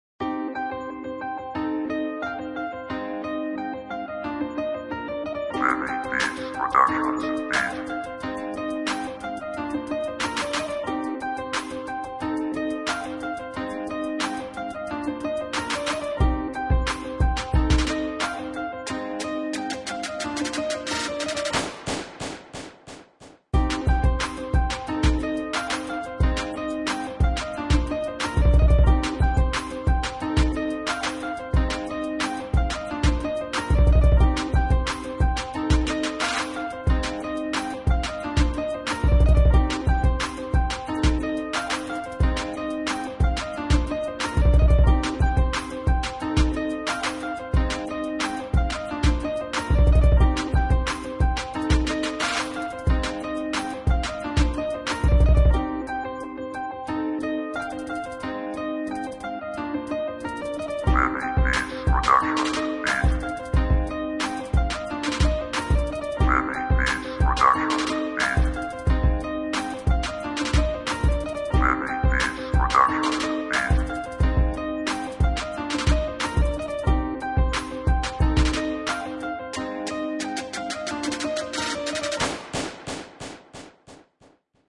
Piano beat by viniibeats
fl, studio